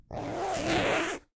One of many recordings of me zipping up my jacket in a soundproofed room.